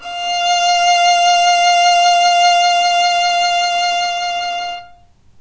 violin arco vib F4
violin arco vibrato
arco, vibrato, violin